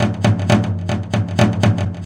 industrial loop short
The loop has been created using a step sequencer and the sound of a drumstick on a metal barrel. There's a short delay as FX.
delay, percs, percussion-loop, quantized, rhythm